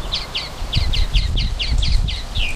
Birdsong recorded at Busch Wildlife Sanctuary with Olympus DS-40.
ambient, bird, birds, field-recording, nature, song